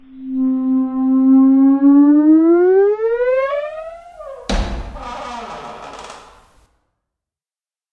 Door creaking 04 2
close, closing, clunk, creak, creaking, creaky, door, handle, hinge, hinges, lock, open, opening, rusty, shut, slam, slamming, squeak, squeaking, squeaky, wood, wooden